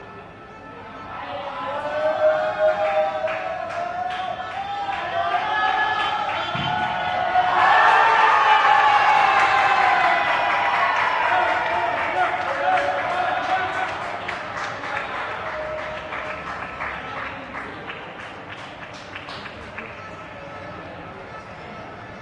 20100711.worldcup.11.celebration
people celebrating the victory of Spain in the 2010 FIFA World Cup final (Spain-Netherlands). Sennheiser MKH60 + MKH30 into Shure FP24 preamp, Olympus LS10 recorder